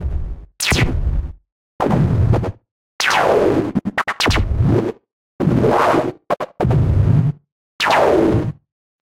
A creative glitch loop that emulates to sound of a brain when high on caffine. This irregular pulse is made courtesy of Malstrom synth added with some chorus and slight delay. Good for ambient and glitchy compositions.